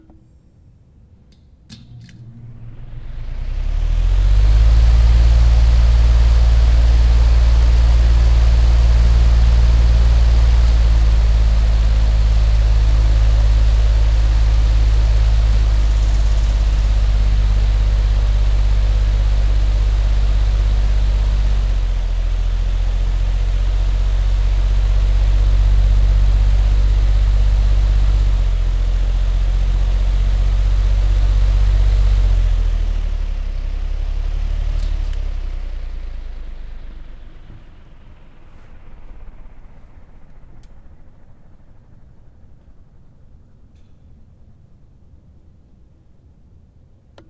industrial fan being blown at several different speeds.
background, fan, industrial, air, wind